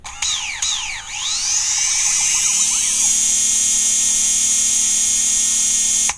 The propellerless take-off sequence from a mavic pro from dji
dji, drone